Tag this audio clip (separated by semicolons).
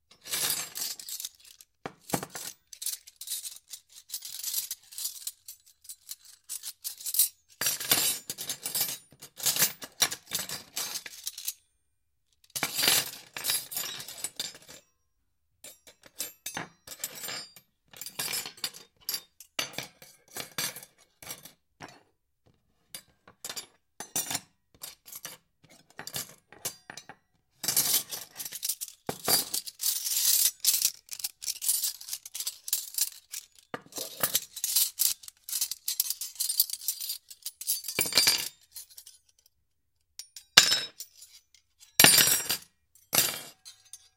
foley; sounddesign; sfx; indoor; sound; kitchen; effect